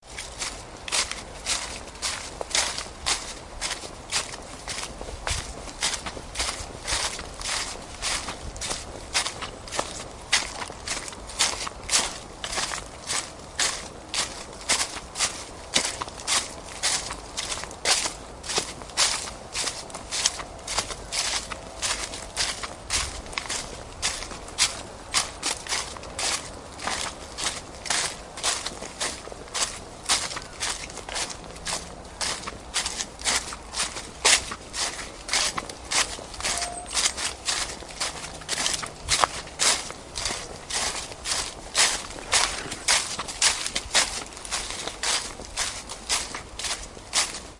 Footsteps, Dry Leaves, D
Raw audio of footsteps through dry crunching leaves down a footpath.
An example of how you might credit is by putting this in the description/credits:
crunch, leaf, footsteps, leaves, footstep, crisp, dry